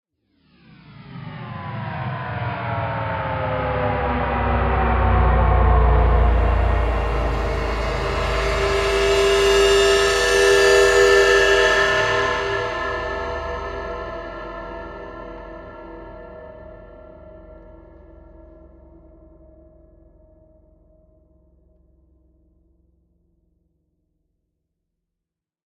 Creative Sounddesigns and Soundscapes made of my own Samples.
Sounds were manipulated and combined in very different ways.
Enjoy :)
Atmospheric, Bending, Cinematic, Crescendo, Cymbal, Dimension, Folding-Space, Sci-Fi, Sound-Effect, Soundscape, Space, Spooky, Strange